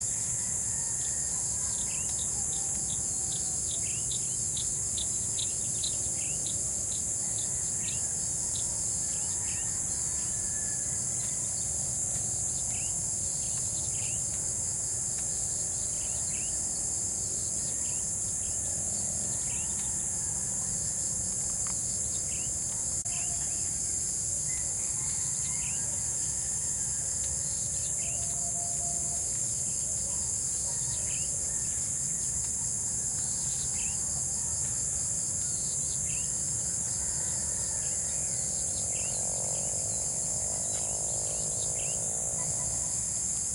Crickets and birds in a field and trees on a quiet road.